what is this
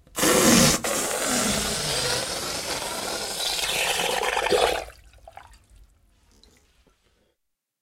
Balloon-Deflate-01-Bubbles
Balloon deflating and submerging in water. Recorded with Zoom H4
balloon, bubbles, deflate